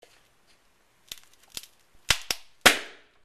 A piece of plywood, breaking. (1)